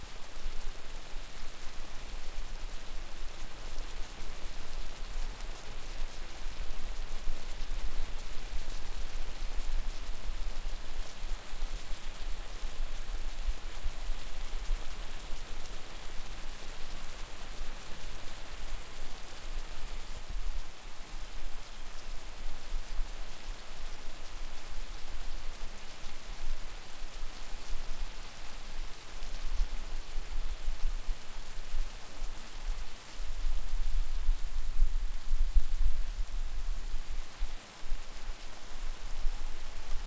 Some stir fry recorded up close. Good for rain ambience too.
ambience, cooking, fry, field-recording, frying, rain, sizzle, stir, food, oil